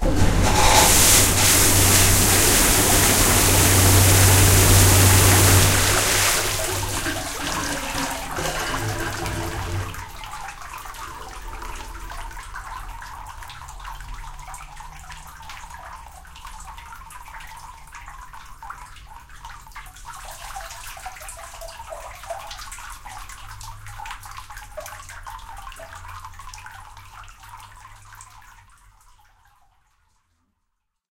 Saw for stones cooled with water
I recorded the sound in the studio of a sculptor Yves Dana. He saws blocks of marble that is cooled with water.
marble, stone, water